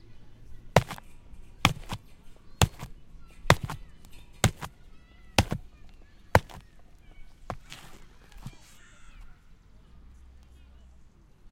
Punching rubber tire
This was recorded with an H6 Zoom recorder at Zita park where I hit a tire giving an almost squeaky/cartoonish sound effect, the tire itself was recycled as a shock absorber for a see-saw.
hitting, impact, OWI, punching, strike, striking, tire